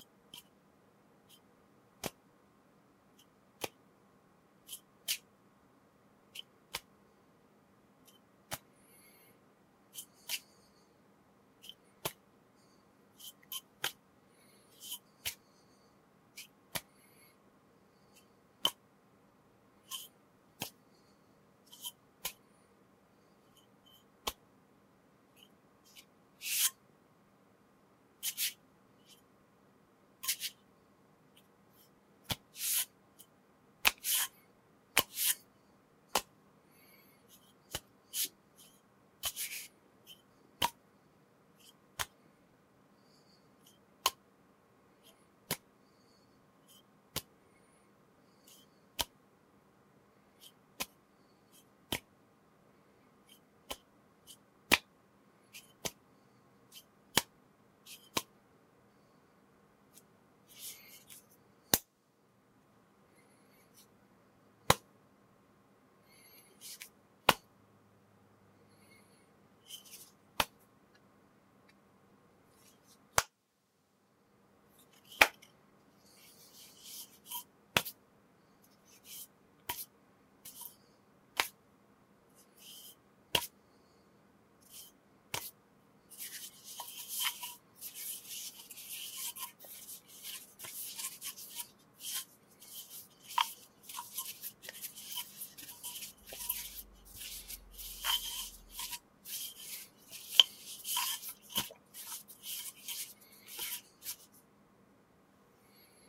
Lump hammer (wooden handle) being handled in various ways. Close mic with Tascam DR100. Cleaned up a bit (light compression).